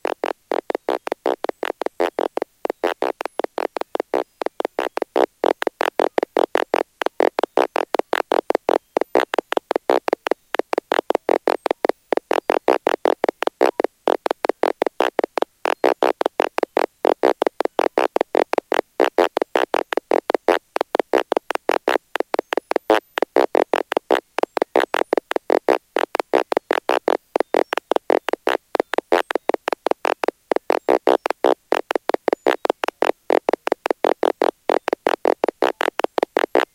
bogo sort
Sound demonstration of the Slow Sort algorithm with an array of 100 components.